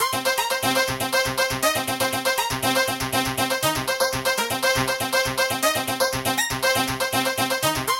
Inspired by the main intro theme for the 1980's show Airwolf! This loop is played in key C. You can likely change the key in your audio program like Audacity.
Need a retro intro to go with that?
Thanks!